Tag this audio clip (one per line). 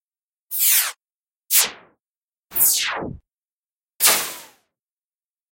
power
fast
speed
science-fiction
sci-fi
powerdown
weapon
game-design
poweron
poweroff
speedup
change
laser
slowdown
lazer
fantasy
turn-on
slow
star-wars
star-trek
levelup
lazor
powerup